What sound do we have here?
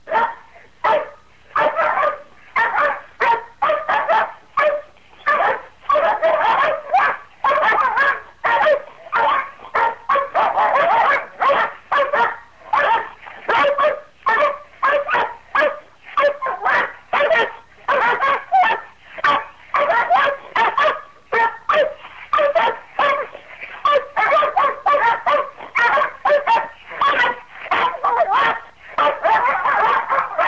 Two hounds barking for about 30 seconds. Recorded on a Sony ICD-85 with an Optimus 33-3013 microphone. Recorded indoors in a room with cement floor which adds a teeny tiny bit of echo.